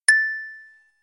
A correct sound I used in one of my animations.
Created in 3ML Piano Editor.
correct, ding, effect, game, ping, right, sound